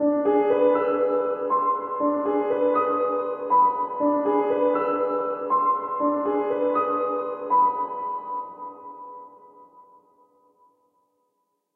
lonely piano2 60bpm
pad, piano, background-sound, instrumental, mood, atmosphere, ambient, background, scary, soundscape, drama, horror, chord, trailer, suspense, movie, dramatic, instrument, music, radio, spooky, dark, jingle, interlude, cinematic, film, loop, ambience